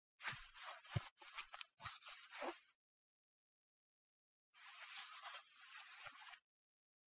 Foley cloth movement. AKG 460 with CK-1 capsule into Neve 1272 preamp, Apogee mini-me converter. Edited in Samplitude.
cloth, foley